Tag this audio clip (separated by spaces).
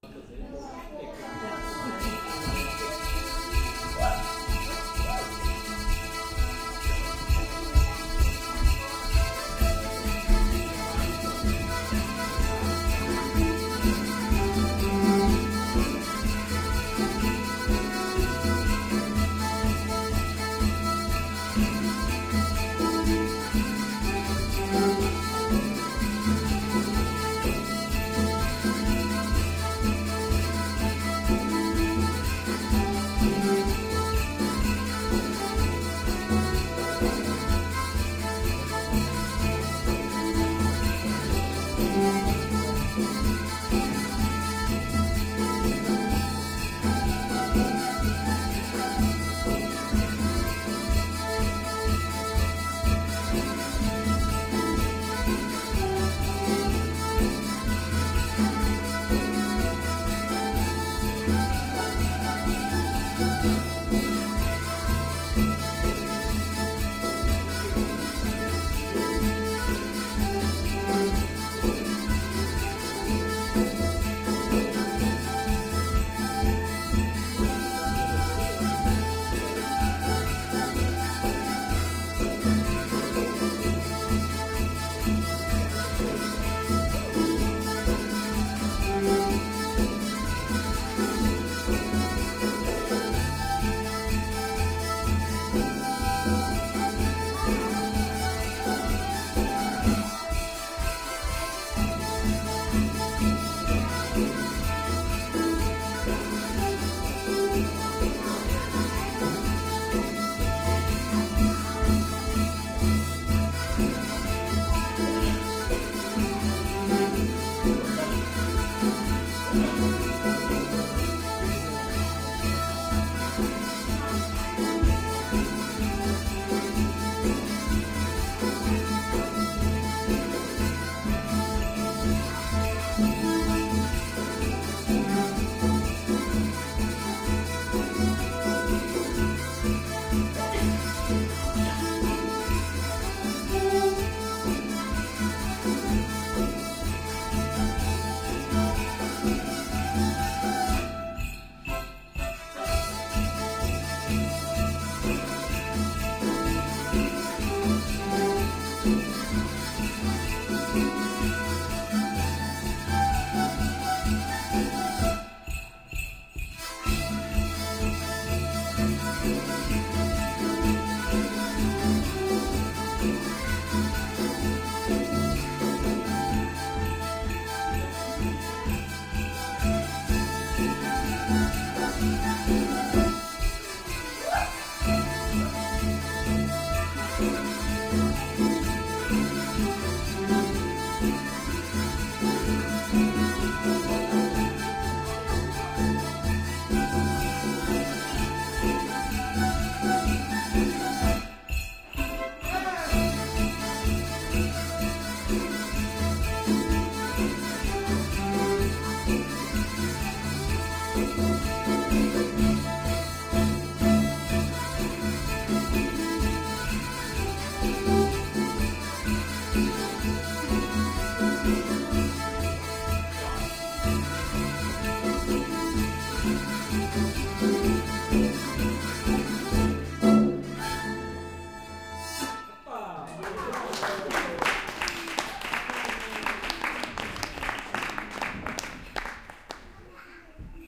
band bork concert denmark drum drums entertainment fedel fiddle field-recording gige gigue historical history hurdy-gurdy instrumental internal-microphone jutland medieval middle-age middle-ages music musicians olympus-ls100 Saltarello